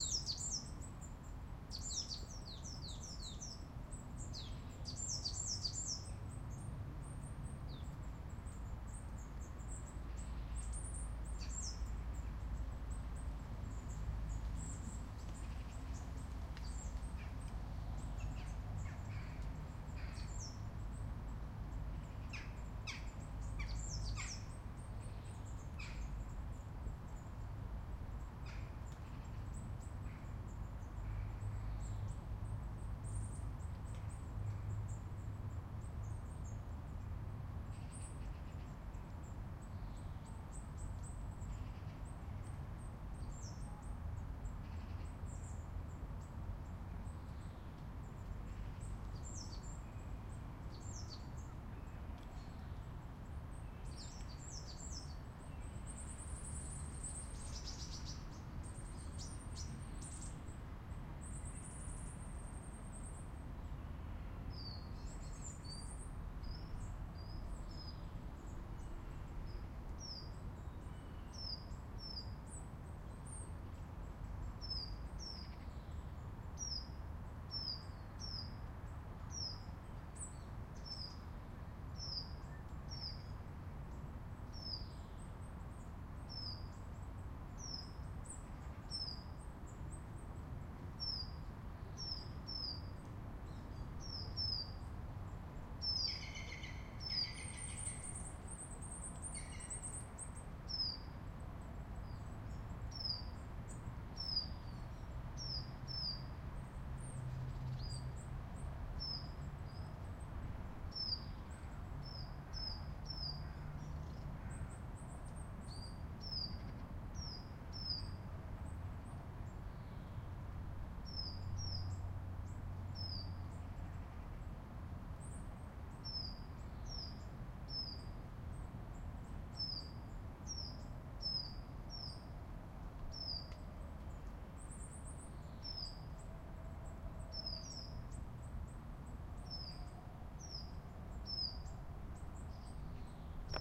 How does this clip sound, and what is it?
bute park ambience
This was recorded on a late summer's afternoon in Bute Park, Cardiff, just by the Taff. For any cricket aficionados, it was directly opposite Sophia Gardens.